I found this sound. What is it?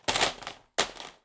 DVD on floor
Multiple DVD Shells dropped to floor / on the ground